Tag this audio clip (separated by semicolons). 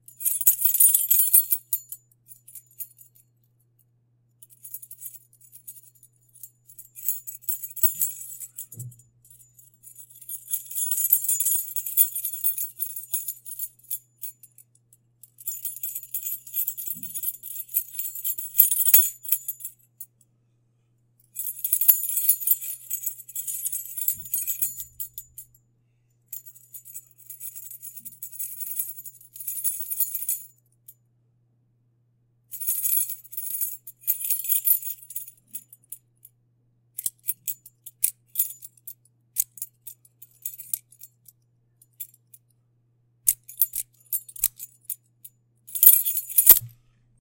llaves; llavero; ring; key; run; metal